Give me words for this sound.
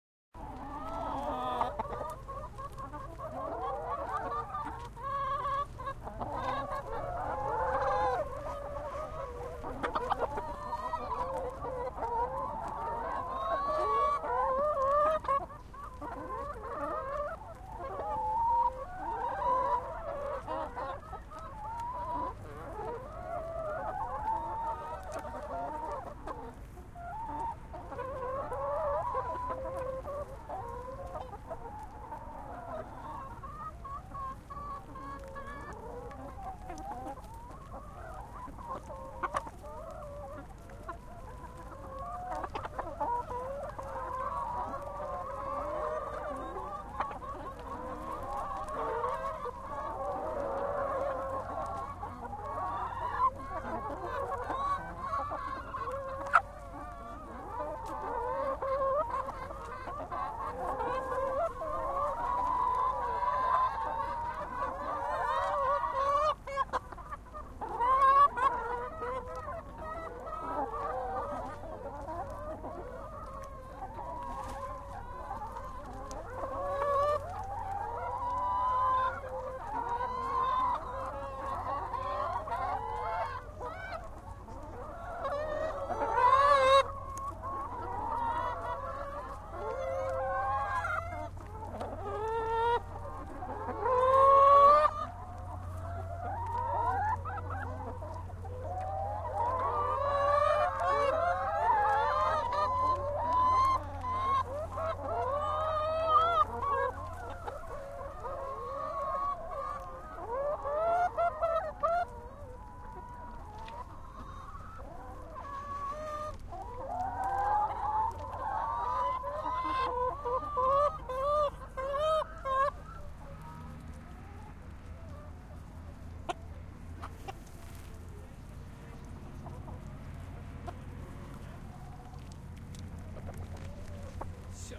chicken on farm
field, chicken